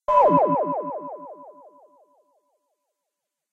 space bloop
An echoing abstract bloop. An attempt at making an "Astroboy"-style effect.
echo, bloop